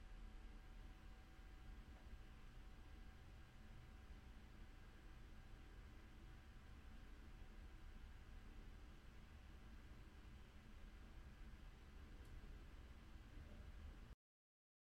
Room Ambience Plain
A quiet room ambiance.